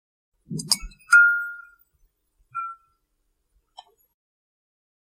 Fluorescent lamp start 1
fluorescent tube light starts up in my office. Done with Rode Podcaster edited with Adobe Soundbooth on January 2012
fluorescent, light, office, start, switch